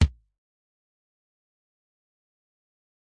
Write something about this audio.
Trigger Kick 4
Drum trigger sample for drum enhancement in recordings or live use.
Recorded at a music store in Brazil, along with other kicks and snares, using Audio Technica AT2020 condenser, Alesis IO4 interface and edited by me using the DAW REAPER. The sample is highly processed, with comp and EQ, and have no resemblance with the original sound source. However, it adds a very cool punch and tone, perfect for music styles like rock and metal.
drum, metal, rock, trigger